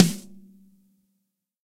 BRZ SNARE 006 - NOH
This sample pack contains real snare drum samples, each of which has two versions. The NOH ("No Overheads") mono version is just the close mics with processing and sometimes plugins. The WOH ("With Overheads") versions add the overhead mics of the kit to this.
These samples were recorded in the studio by five different drummers using several different snare drums in three different tracking rooms. The close mics are mostly a combination of Josephson e22S and Shure SM57 although Sennheiser MD421s, Beyer Dynamic M201s and Audio Technica ATM-250s were also used. Preamps were mainly NPNG and API although Neve, Amek and Millennia Media were also used. Compression was mostly Symetrix 501 and ART Levelar although Drawmer and Focusrite were also used. The overhead mics were mostly Lawson FET47s although Neumann TLM103s, AKG C414s and a C426B were also used.
live, space